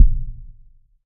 See description of Thud_3_Dry -- this is it with a short reverb mixed in at relatively high volume.
A thud is an impulsive but very short low frequency sweep downward, so short that you cannot discern the sweep itself. I have several thuds in this pack, each sounding rather different and having a different duration and other characteristics. They come in a mono dry variation (very short), and in a variation with stereo reverb added. Each is completely synthetic for purity, created in Cool Edit Pro. These can be useful for sound sweetening in film, etc., or as the basis for a new kick-drum sample (no beater-noise).